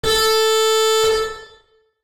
Car Horn
Car honking.
You can use my sounds freely.
2020.
DLGS music
beeping, honk, traffic, siren, street, beep, horns, warning, noise, horn, hooter, cars, alarm, caution, road, brass, car, ambience, field-recording, train